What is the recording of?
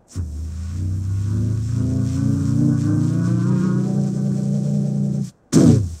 RailGun - Single
Alien, alien-sound-effects, Future, Futuristic, Gun, Machine, Sci-Fi, Space, Weapon